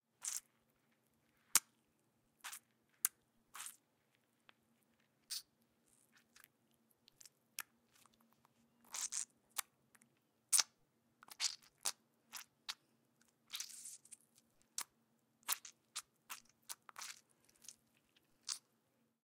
Squishy Sounds; Near
Me digging my finger into an orange.